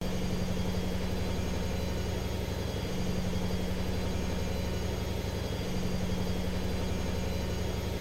washing machine D (monaural) - Spin 3
Original 3s field recording pitch-shifted to remove pitch variation due to change in spin speed. Then three concatenated with fade-in/fade-out to create longer file. Acoustics Research Centre University of Salford